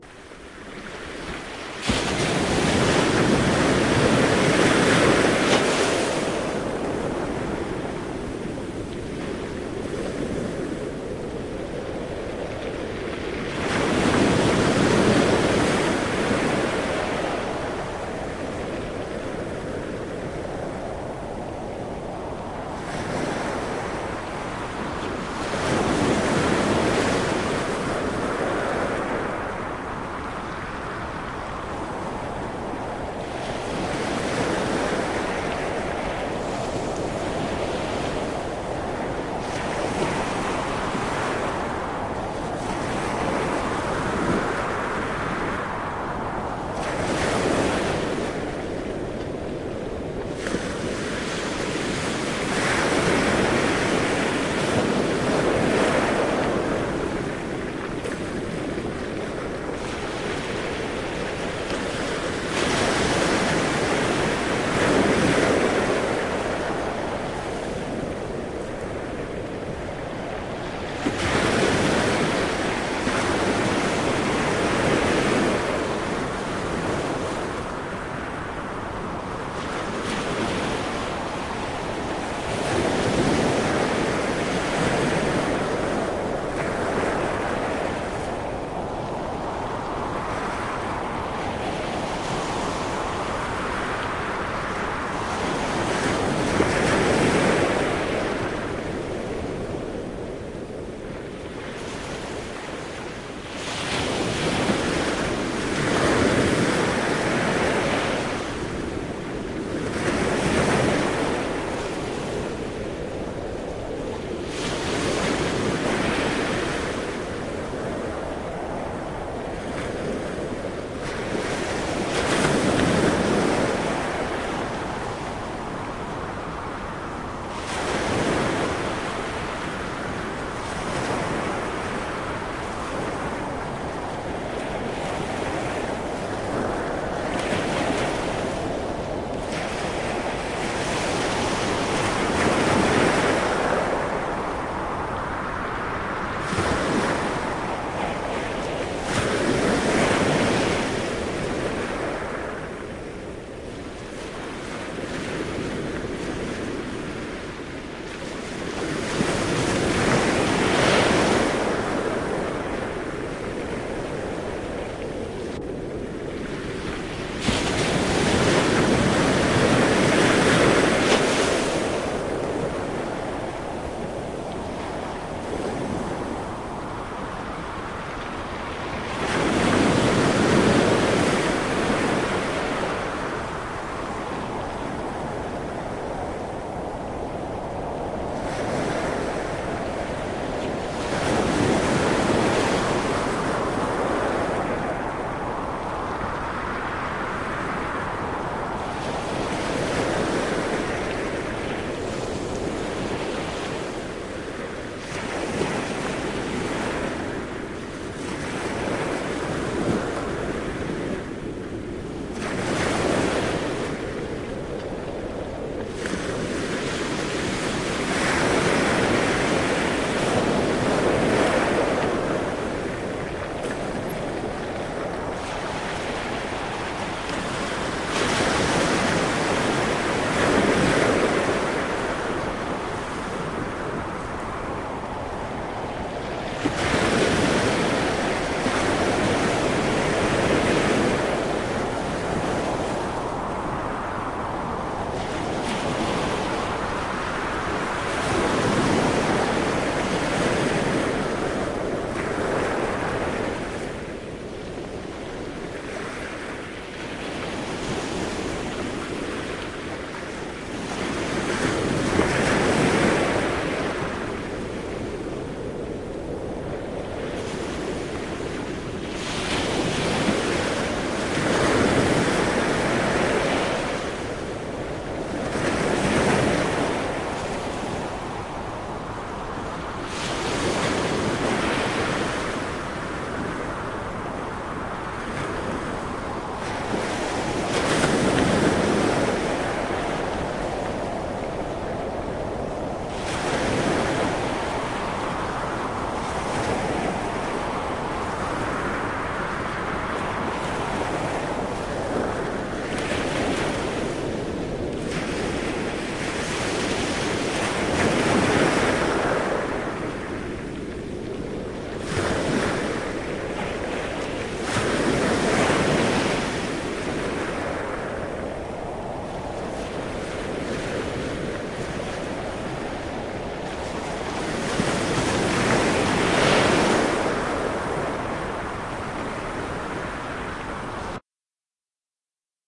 Loop of howling wind over waves crashing on beach
wind, beach